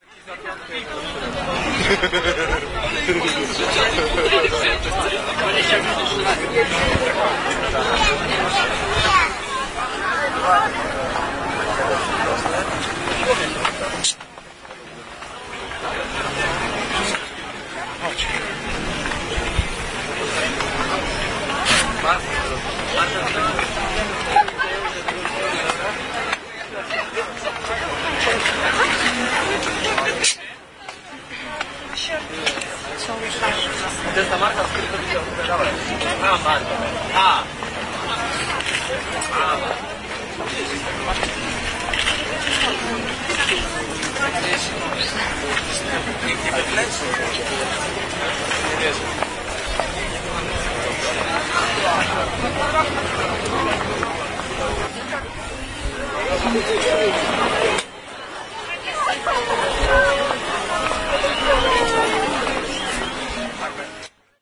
jarmark marcin2
11.11.09: between 19.30 and 21.00; the annual fair on Saint Marcin street name day (in the center of Poznań/Poland); people selling funny objects, food, souvenirs and speciality of that day: rogale świętomarcińskie (traditional croissants with white poppy filling. in the background some concert.
no processing (only fade in/out)
annual, buying, concert, crowd, field-recording, people, poznan, saint-marcin-street-name-day, street, voices